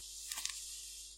Paper slide
Two pieces of paper sliding
paper, page, sliding